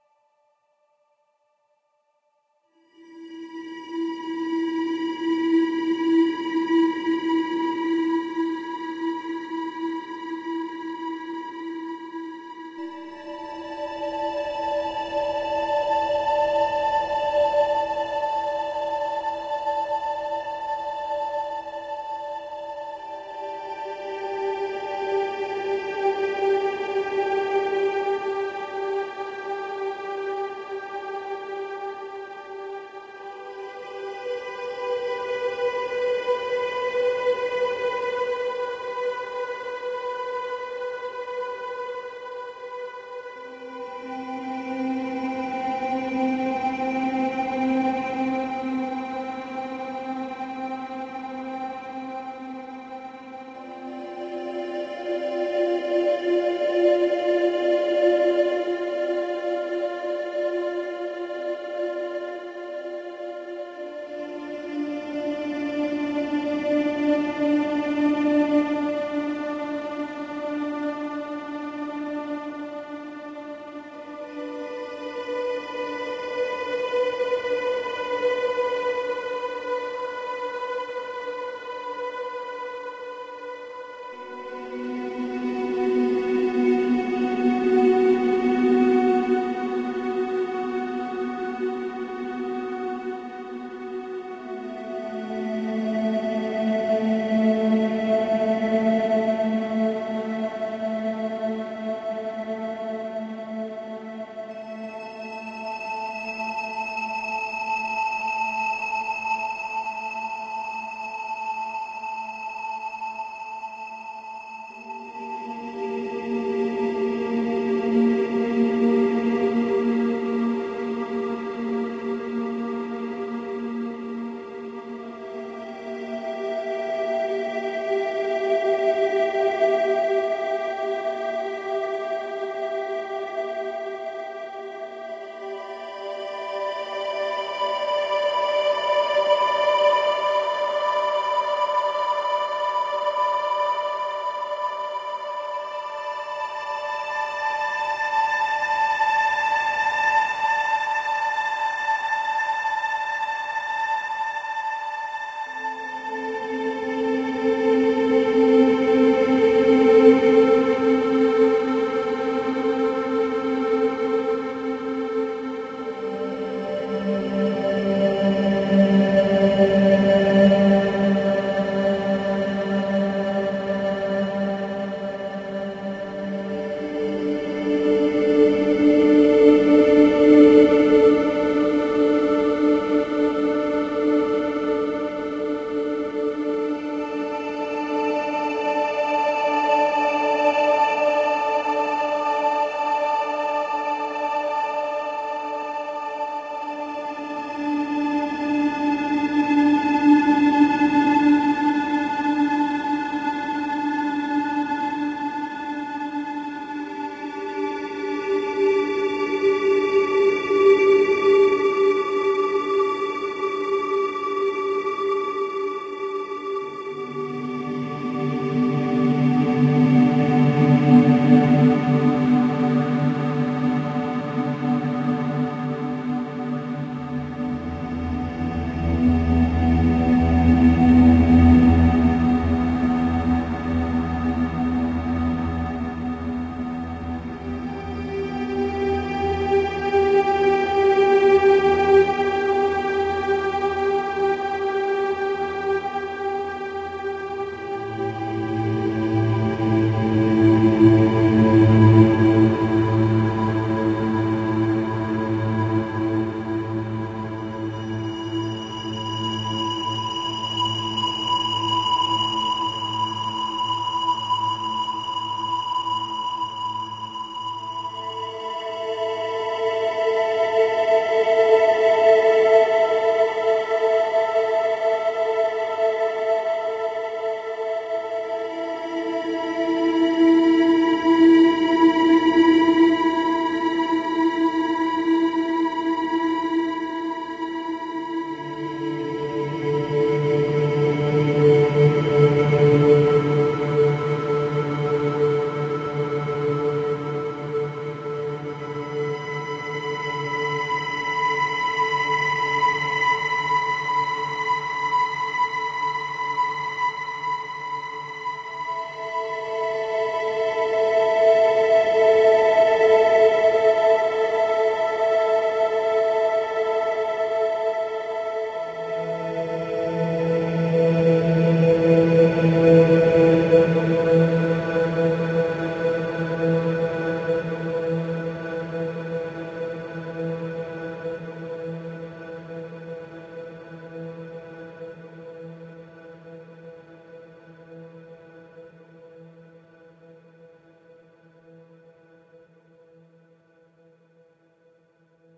Ambient melody drifting across space.
melodic, drone, ambient, new-age, soundscape, space